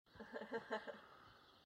Giggle Girl laughing ironically ironic laugh from girl